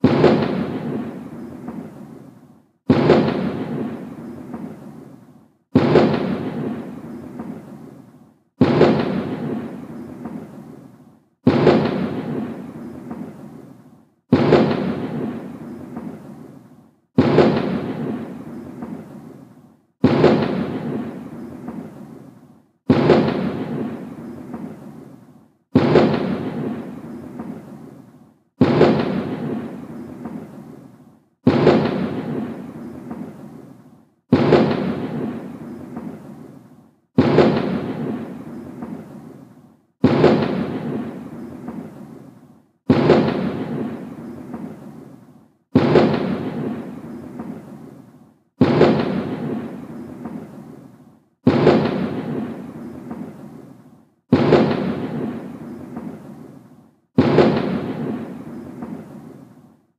1 Minute sample loop of a fireworks explosion field recording, Easter Saturday, Neutral Bay, Sydney, Australia.